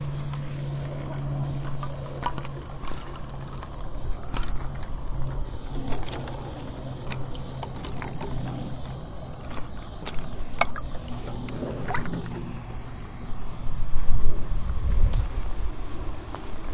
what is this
Breaking Ice2
The sound of thin ice cracking when hit.
ice, frozen, crack, breaking, winter